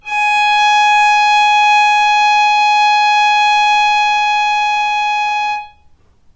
violin arco non vibrato